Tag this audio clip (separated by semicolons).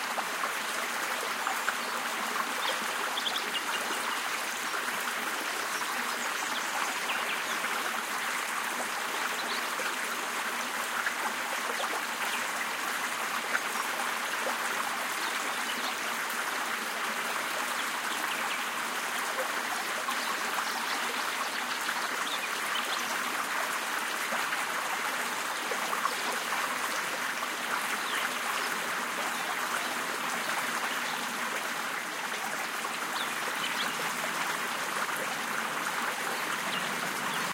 field-recording; nature; stream; water